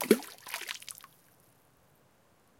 Tossing rocks into a high mountain lake.